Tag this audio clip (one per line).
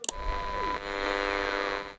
radio
click
turn
static
turning